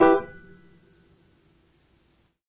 Tape Piano 25
Lo-fi tape samples at your disposal.
collab-2, Jordan-Mills, piano, tape